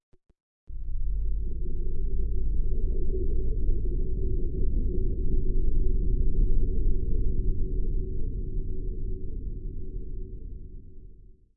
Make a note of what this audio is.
Somewhat creepy bass drone made with vst synths. No other processing added.
ambient, bass, drone, soundscape